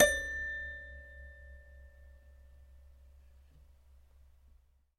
multisample pack of a collection piano toy from the 50's (MICHELSONNE)
collection, michelsonne, piano